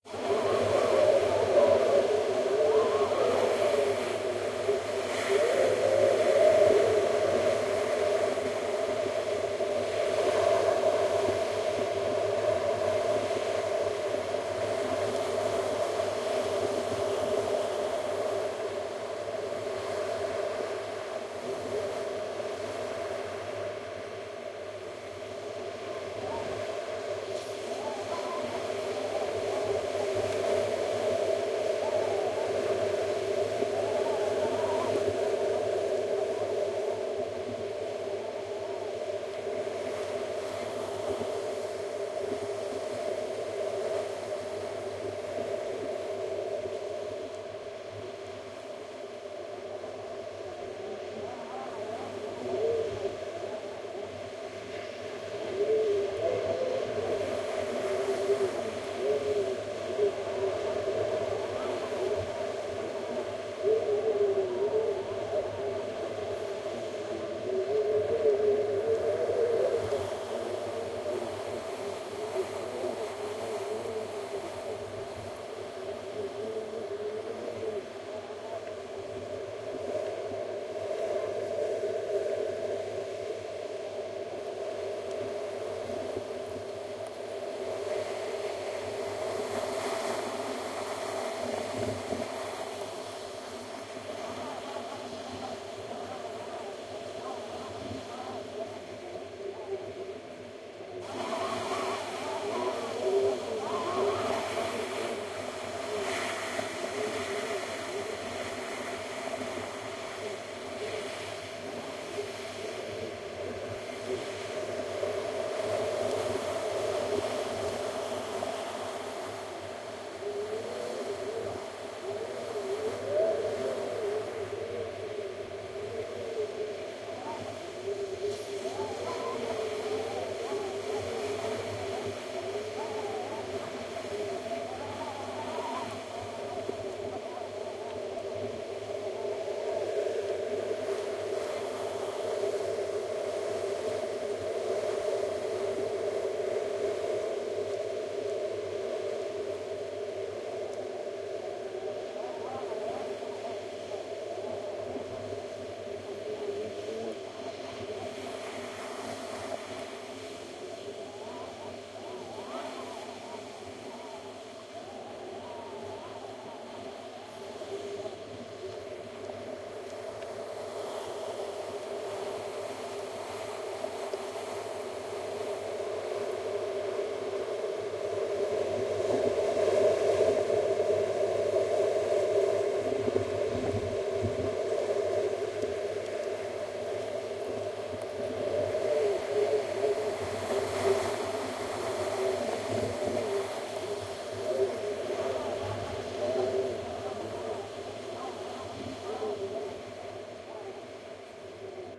Winter Wind Mash-Up fast
gale, gust, gusts, nature, storm, weather, wind, windy, winter